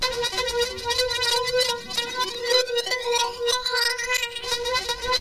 an echoey hook with a shivery sort of feel to it; made in Adobe Audition